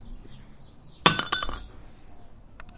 glass break2
Actually a small peice of twisted metal falling onto concrete.
foley, crack, sound, shatter, breaking, smash, glass, break